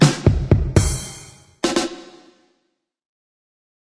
Just a drum loop :) (created with flstudio mobile)
beat, drum, drums, dubstep, loop, synth